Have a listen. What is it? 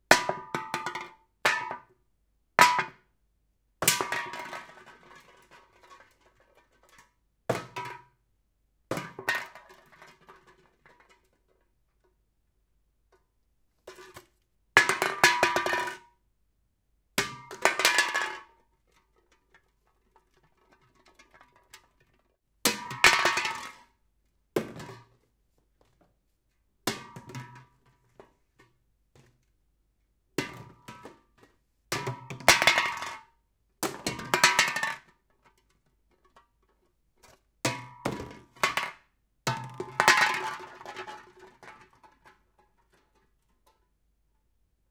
Empty Energy Drink Can Drop
An empty red bull can falling on the floor.
Result of this recording session:
Recorded with Zoom H2. Edited with Audacity.
beverage, red-bull, dropping, drink, drop, junk, empty, can, trash, aluminium, hollow, metal